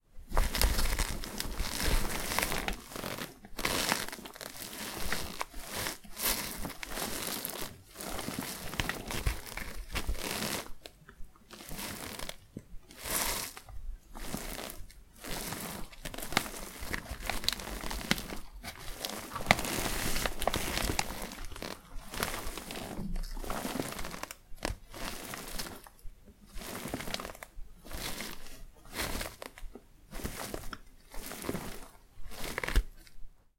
gear-friction
This is a bunch of sounds from bending and moving soft equipment. It was used to create audio for the movement of some geared-up police operators in a game.
tactical, police, equipment, motion, gear, friction, operator, shafing